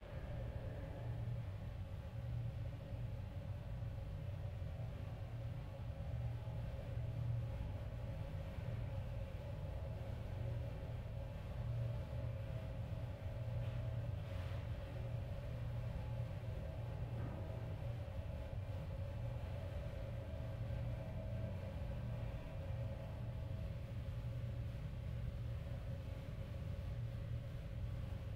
Industrial Ambience.L
Ambience from a large warehouse space
Ambience, Large-room, Reverberant